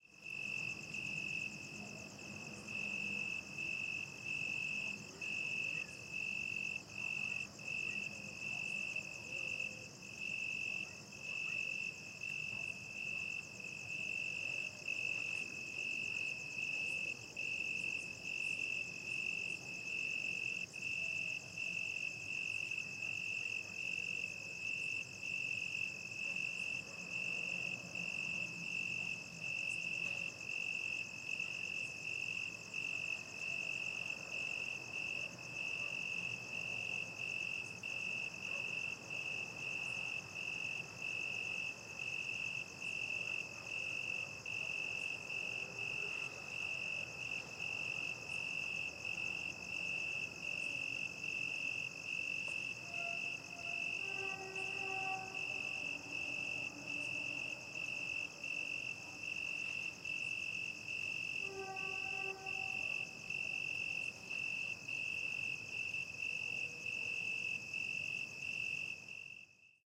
Night time crickets, distant dogs and traffic ambience
This is a field recording of a late night ambience in a suburban area in India. A variety of insects, predominantly crickets can be heard along with distant dogs and traffic. This high resolution clip has been cleaned to eliminate LF hum and other intermittent loud noises.
ambience, crickets, dark, field-recording, India, insects, night, stereo, suburbs